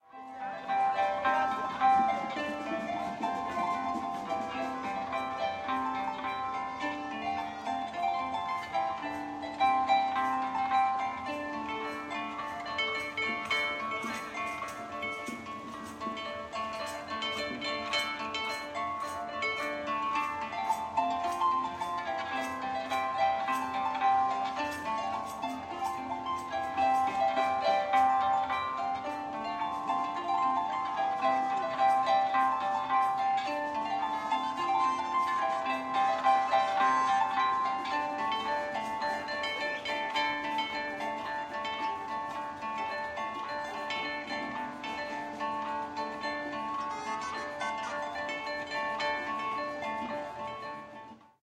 Harp player from Peru playing a popular tune before starting the parade at the Berlin Carnival of Cultures May 2010(Karneval der Kulturen). Zoom H2